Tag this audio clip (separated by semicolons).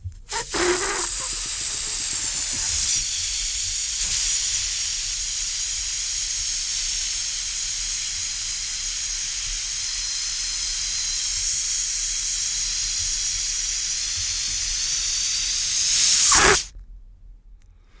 Gas; Pressure